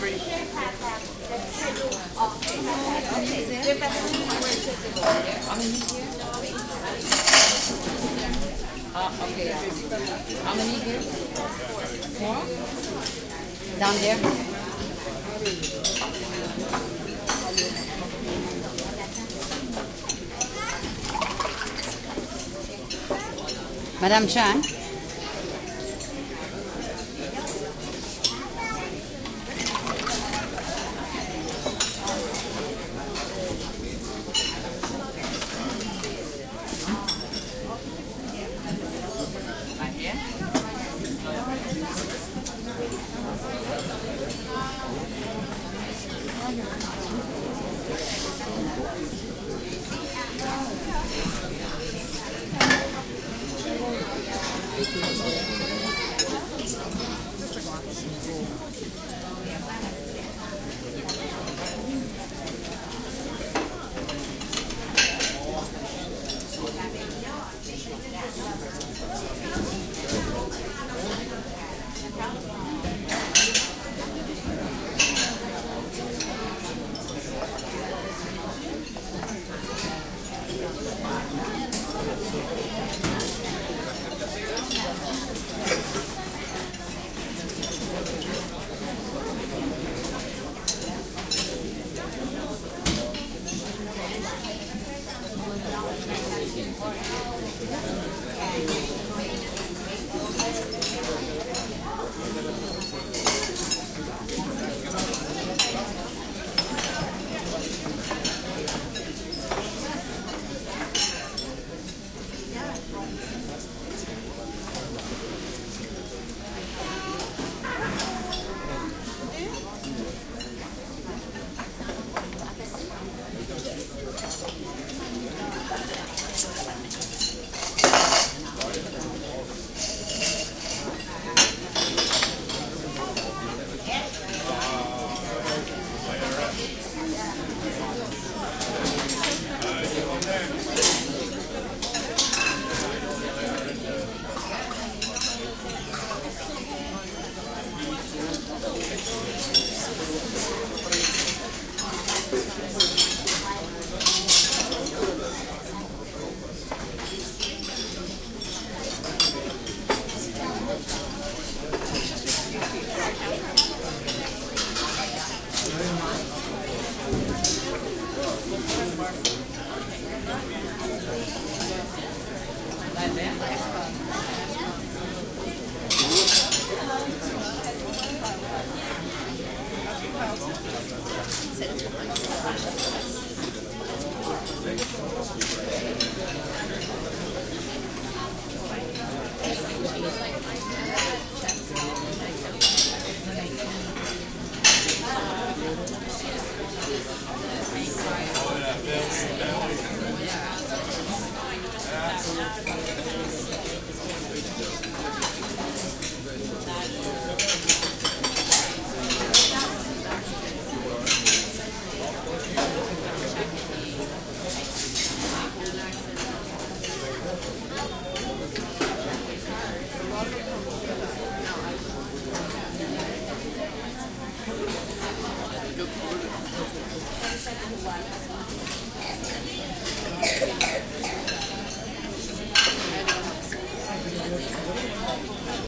crowd int medium murmur restaurant busy buffet dishes cutlery tinkling Montreal, Canada
busy, Canada, dishes, medium, murmur